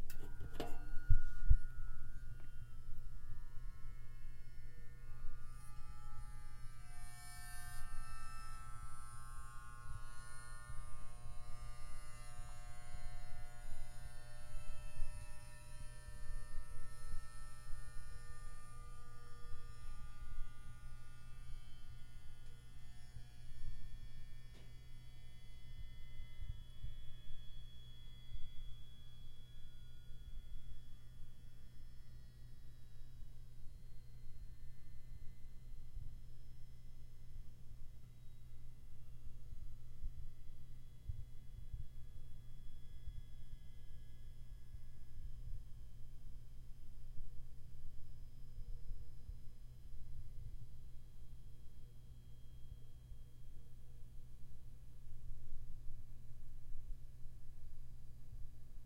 Eerie background space sound
A creepy background sound I recorded with a space heater.
warm, ambient, spooky, strange, creepy, fx, future, weird, drama, noise, abstract, drone, electric, atmosphere, anxious, sound, coil, thrill, space